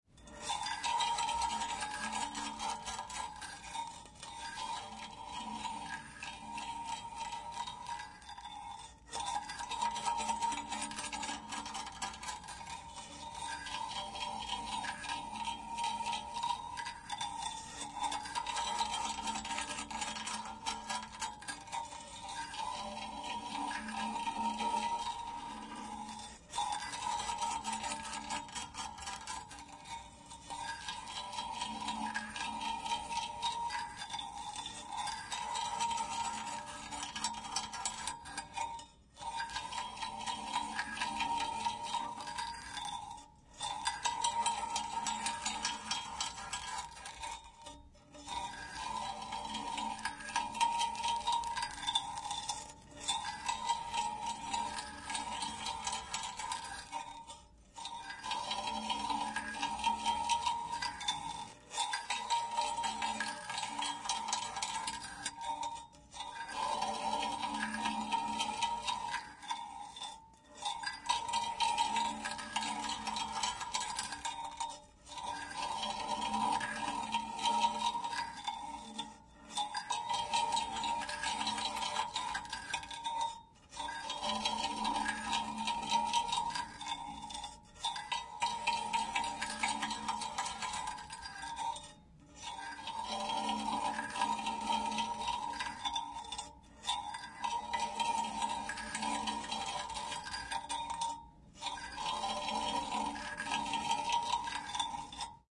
texture small metal grid
sound texture- small metal grid. created by moving a dry paint brush on a metal grid that is attached to a metal box/housing.
KM201-> TC SK48.
metal, metal-grid, movement, recording, resonance, small, texture